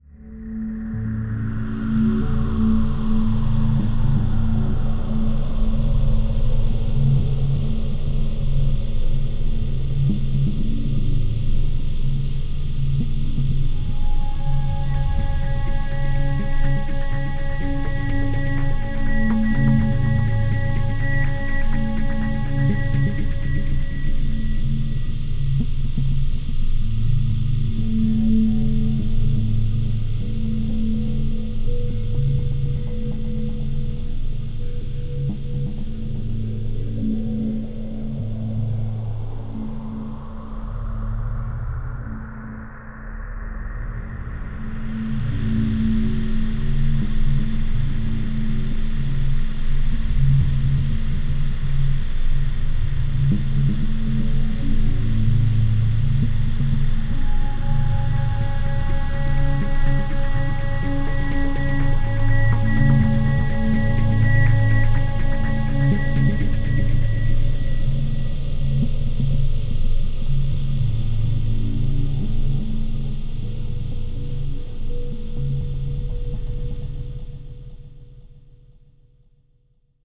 Atmospheric rhythmic mix of synth and wind sounds. Part of my Atmospheres and Soundscapes pack which consists of sounds designed for use in music projects or as backgrounds intros and soundscapes for film and games.
ambience, atmosphere, cinematic, electro, electronic, music, processed, rhythm, synth, wind